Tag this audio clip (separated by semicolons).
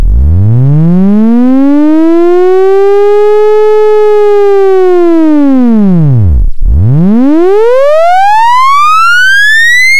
formula; mathematic